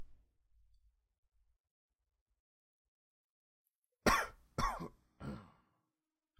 A small, multi-part cough, well-suited to denote uncomfortable silence, like a more natural alternative to using crickets to demonstrate a lack of reaction from an audience. Recorded on Blue Snowball for The Super Legit Podcast.